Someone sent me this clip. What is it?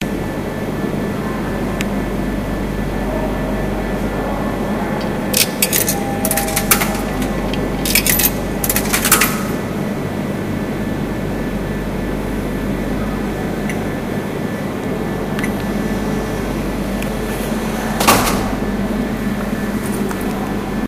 Vending Machine ADM
Purchasing of a snack at a vending machine
dispense, vending-machine, snack